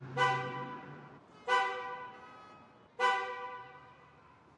HardFX Recording of my car honking in a parking-garage.